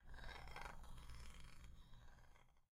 Stick on wood